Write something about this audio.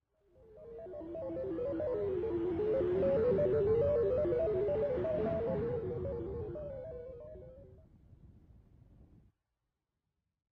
Satellite passing by
Robot